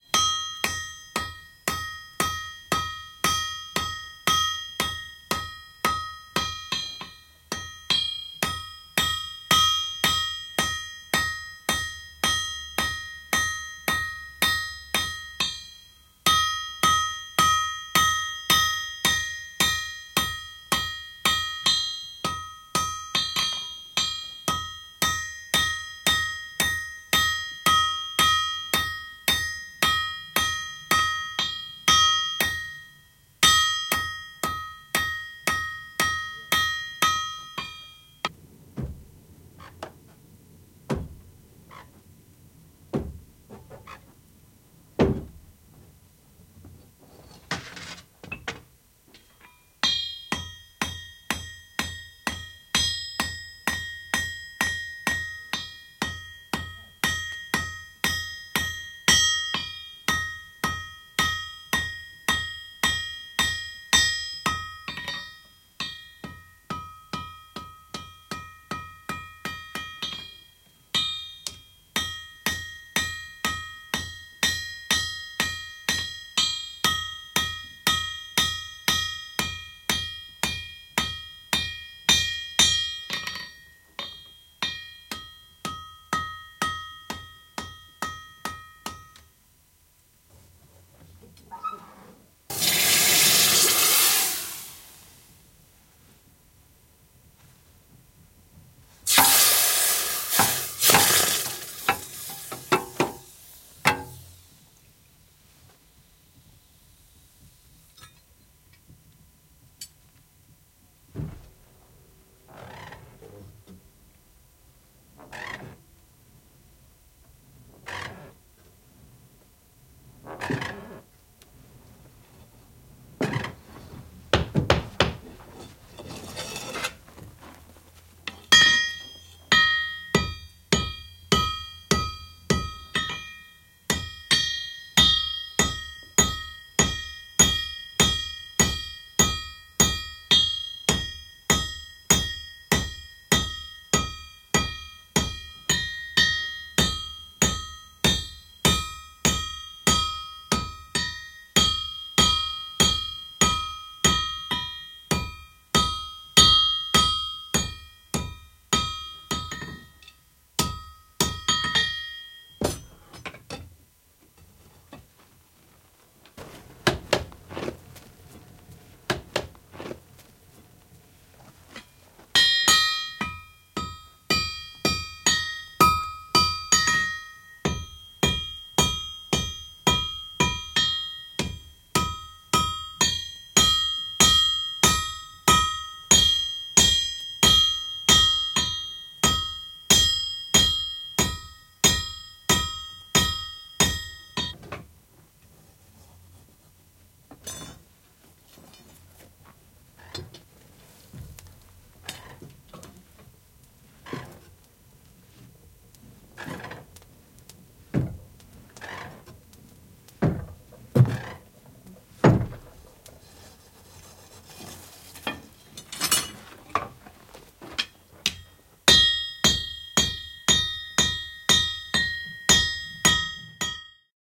Paja, seppä työssä, takomista, karkaisu, palkeet / A smithy, blacksmith working, forging, bellows, hardening
Seppä takoo pajassa, välillä palkeet ja karkaisu.
Paikka/Place: Suomi / Finland / Somero, Sepänmäki
Aika/Date: 20.09.1988
Field-Recording, Finland, Finnish-Broadcasting-Company, Metal, Metalli, Soundfx, Suomi, Tehosteet, Yle, Yleisradio